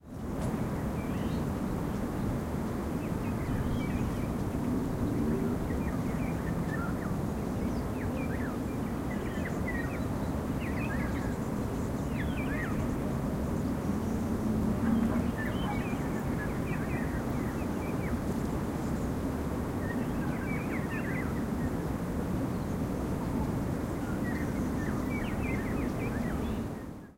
City Atmo B
City atmo recorded with TASCAM DR40 and processed in Adobe Audition CC.
a, ambiance, ambience, ambient, background, city, field-recording, people, soundscape, town, traffic